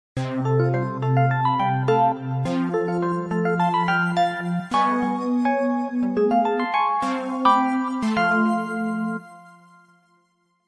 This is the 3rd of a set of electronic snippets inspired by my recent database course. This is the only piece that does NOT loop. Created in GarageBand.
chord-progression, electronic, music